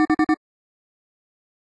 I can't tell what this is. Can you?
4 beeps. Model 3
beep, futuristic, gui